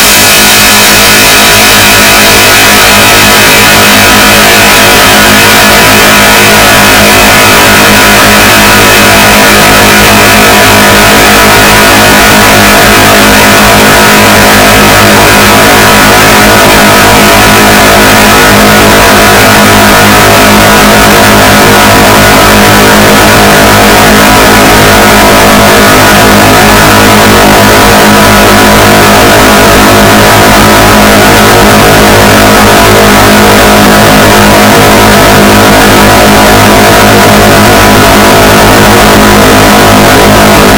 Very Much Distortion
40 Seconds of pure distortion. Nothing more to say. A bit of a mistake happened, while playing with Audacity.
distortion, much, very